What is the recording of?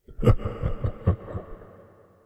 Evil Laugh 3
Sound of a man laughing with Reverb, useful for horror ambiance